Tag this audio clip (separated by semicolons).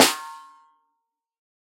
1-shot; drum; multisample; velocity